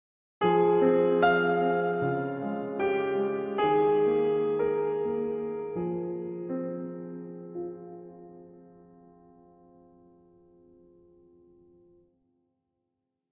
A small melancholic lyrical story.
farewell, lost, melancholy